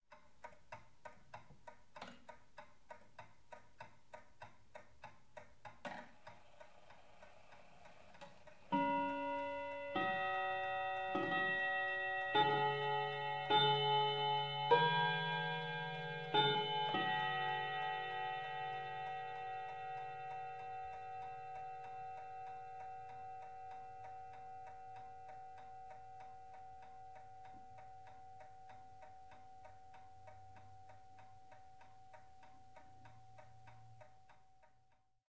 clock-tick-bell
Big old clock ticking, then starts to ring song as on every half a hour. Recorded on Zoom H4n using RØDE NTG2 Microphone. Bit compression added.